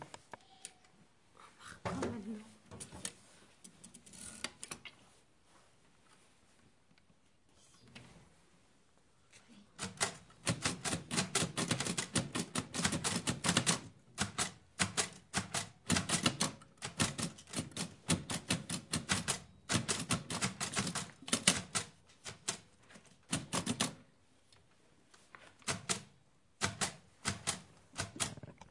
SonicSnaps-IDES-FR-perkins-brailler2
A machine used to write and print in Braille.
France, IDES, Paris